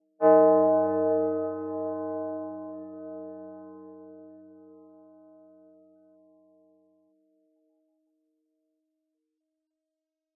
Synthesis of a bell.